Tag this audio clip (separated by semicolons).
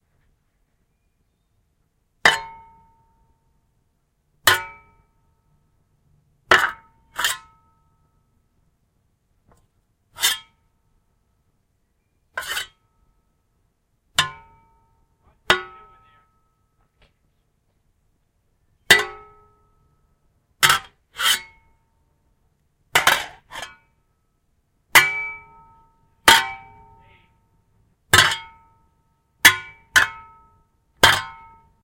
dig; fight; shovel; clang; swing; hit; whoosh